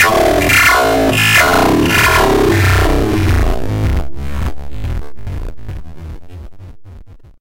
Time Shift
Generated in SXFR, then edited in Audition. The original sample was slowed multiple times, then added a compressor to half of the track. A fade was added to the last 4 seconds.
sample, bit, 8, distortion